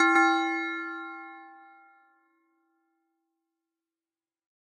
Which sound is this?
Bell Ringing
Made in FL Studio. I use this to signal a level starting in my game.